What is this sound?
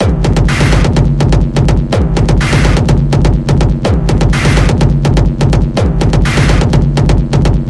Short industrial/EBM drum loop created in FL 8
drums, ebm, electronic, industrial, loop